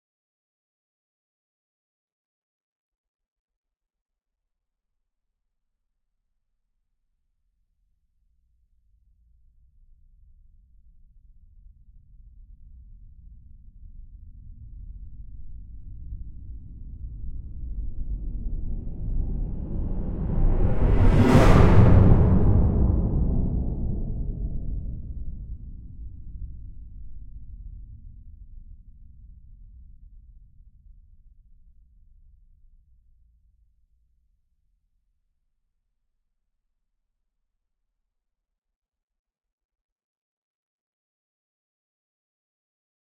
Wait for it. This one has very low levels for the first and last 14 seconds. The middle 14 seconds are where all the fun is. The sound is like an impulse response preceded by its mirror image. But it is not a recording, just a synthetic sound. It can be useful to create a bit of scary impact at some critical point in a dramatic setting or cinematic work.
explosion, frightening, impact, passing, scary, shock, vessel